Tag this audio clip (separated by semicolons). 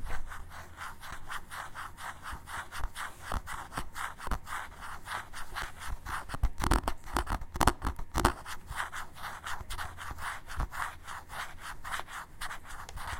field-recordings; sound